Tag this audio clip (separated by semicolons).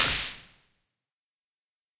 drum; experimental; hits; idm; kit; noise; samples; sounds; techno